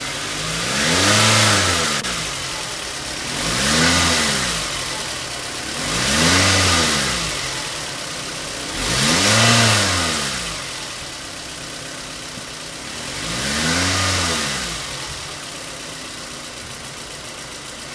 Motor de automóvil encendido
Motor, auto, encendido